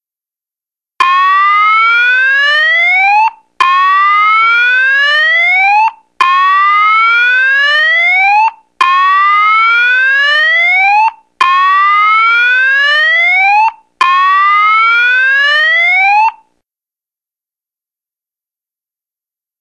Hello guys. Hope your year went well so far. I'm starting myupload chain for December.. Starting off with some siren sounds. The first is a slow whoop sound. Hope you like it, and stay tuned for the next uploads this month.
Whoop, Alarm